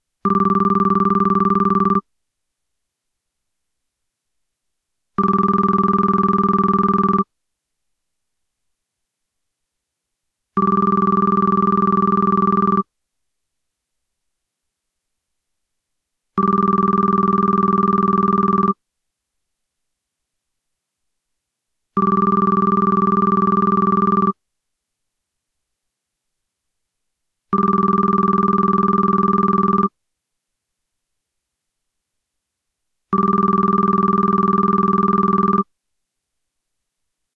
Vintage Telephone
telephone sound effect. sounds like an old office phone.
business, cell, dial, noise, phone, retro, ring, sound, talk, telephone, tone, vintage, voice